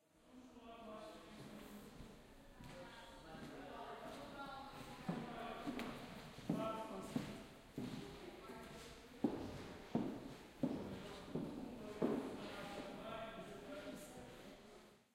guia com passos
Person walking with the voice of a guide in the exposition.
This recording was made with a zoon h2 and a binaural microphone in Fundação de Serralves on Oporto.
binaural, exposition, Field-recording, public-space